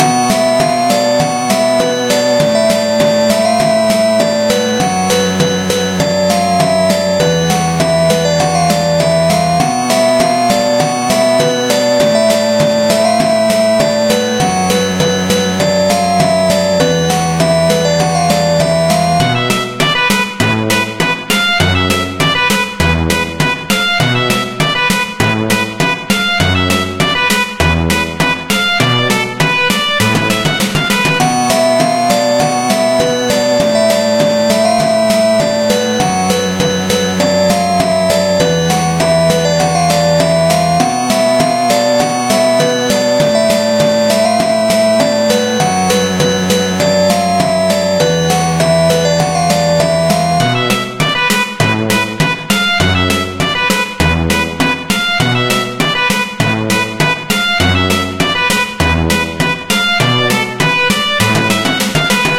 Funny background loop
You can use this loop for any of your needs. Enjoy. Created in JummBox/BeepBox.
funny, synth, ambient, dance, sample, atmosphere, music, electronic, melody, ambience, background, electro, rhythmic, game, soundtrack, loop